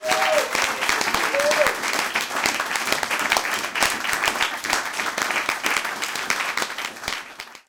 Short Crowd Cheer 2
People cheering at a small (40 people) concert.
The location was Laika and the artist was Princessin Hans.
applaude, applauding, applaus, applause, cheer, clap, clapping, claps, concert, hands, party, people, yay